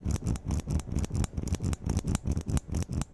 handle gear selection - classic +1500
For this recording, I took an old recording of the inner mechanics of an ice cream scoop, and using Logic, manipulated the pitch (up 1500 cents) using "Classic" mode. As a result of classic mode, the time of the recording changed in accordance with the pitch shift.
gear, ice-cream, machine, manipulated, mechanical, MTC500-M002-s14, noise, repetition, scoop, squeeze